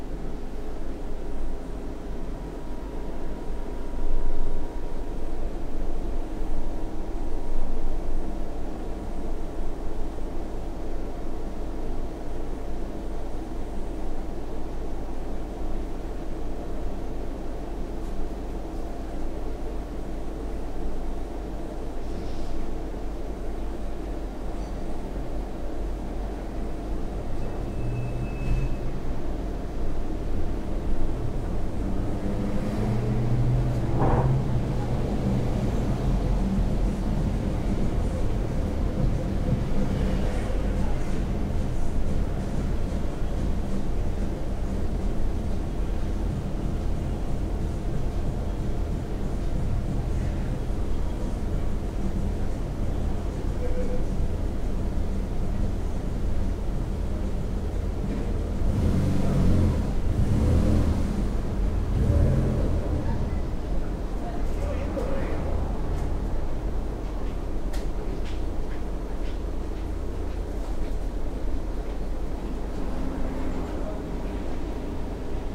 indoors empty bar noisy ambient 2
ambient, bar, empty, indoors, noisy